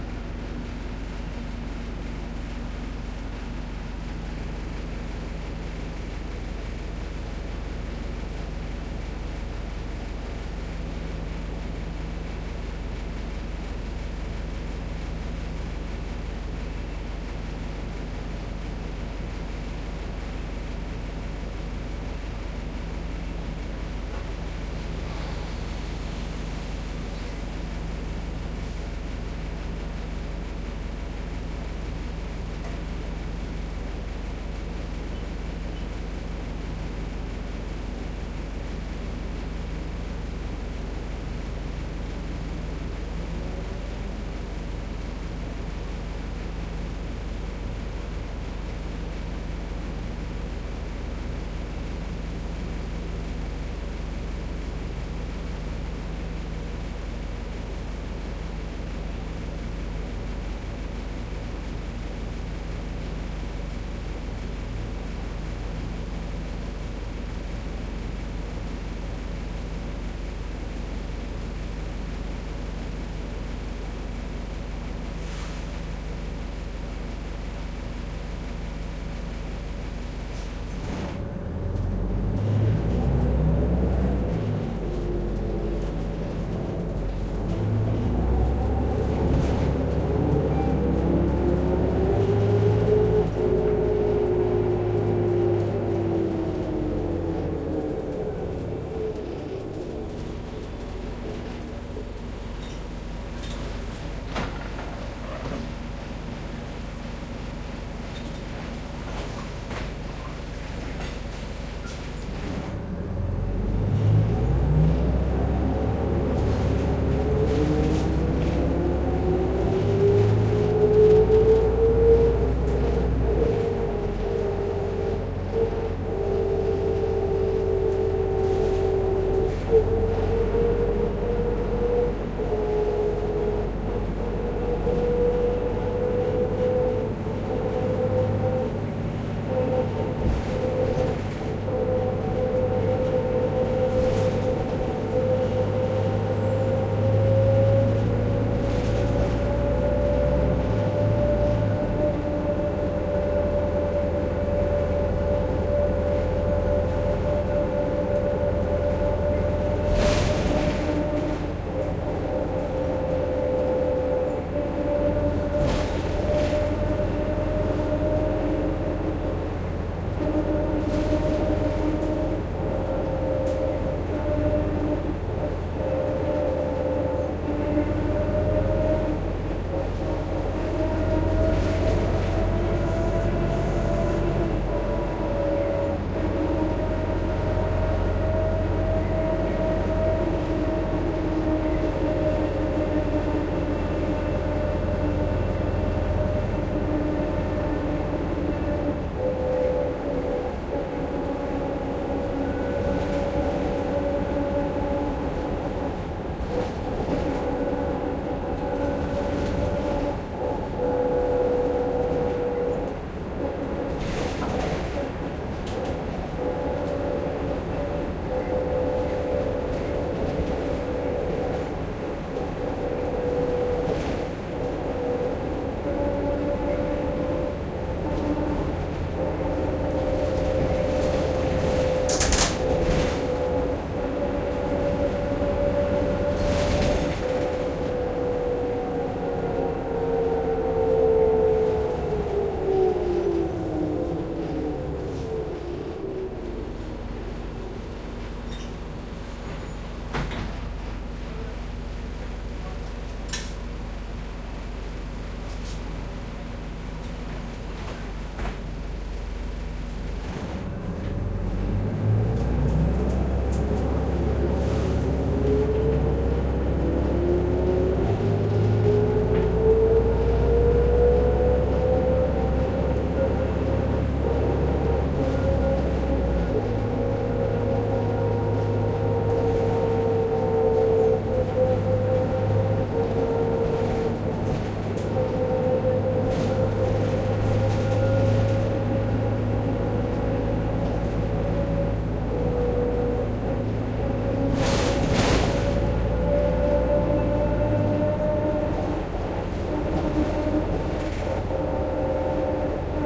Ambient recording of a ride on an old bus (1997 New Flyer D40LF).
For the first minute or so the bus is just idling, waiting at the stop. Bus' windows rattle a little with the vibration of the engine. Then, the doors close and the bus gets underway. Engine makes a distinctive groaning sound, and the kick of the transmission shifting is clearly audible; this bus' transmission has seen better days.
Passengers board at 1:45; bus gets underway again.
Bus hits a couple potholes starting at 2:40; whole bus structure rattles loudly.
At 4:00, bus stops. Doors open; passengers board. Doors close; bus gets underway again.
Bus hits a BIG pothole at 4:55.